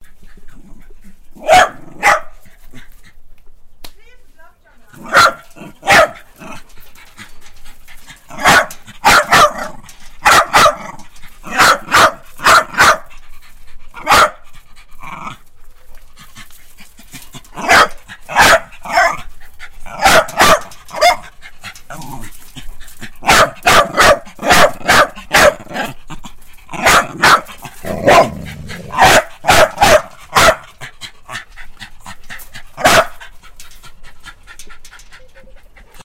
Dog Bark
Barking of dog
Barking-dog, Bark, Dog